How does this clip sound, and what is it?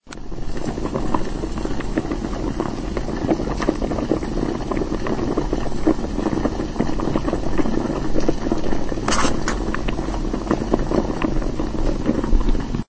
Bubbling water boiling
Bubbling water
Digital recorder